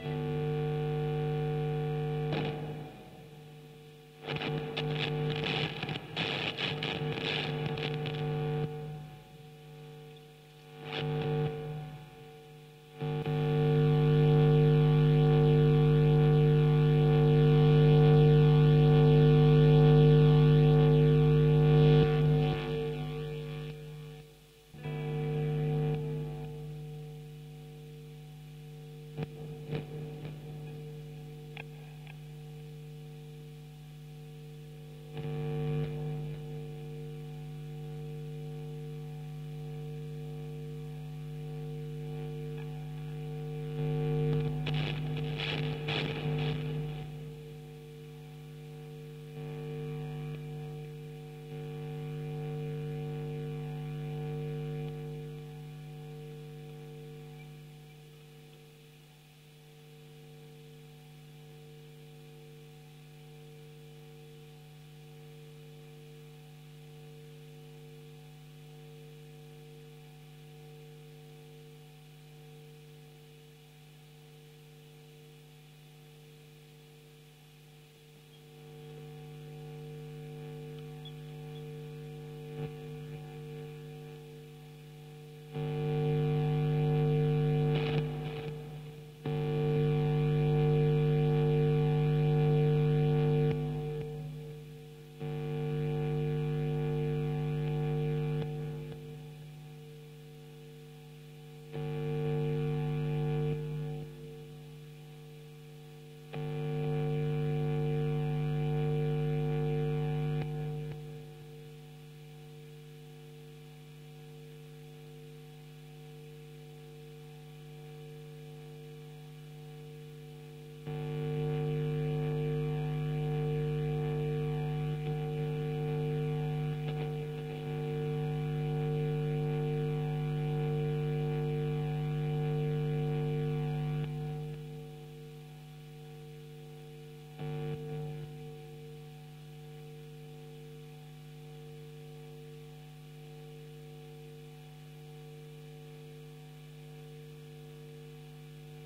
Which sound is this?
Distorted guitar rumble.
Recorded on band repetition.
We recorded our compositions to listen it by side. This is just some rumbles on the start and end of records.
electric, rumble